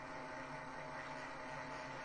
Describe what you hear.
19 FAST FORWARD LOOP
Recording of a Panasonic NV-J30HQ VCR.
loop, pack, retro, vcr